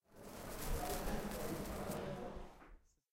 This sound was recorded in the UPF's plaça gutenberg. It was recorded using a Zoom H2 portable recorder, placing the recorder next to someone who was smoking
Although the gain of the sound isn't very high, you can still clearly hear something burning slowly.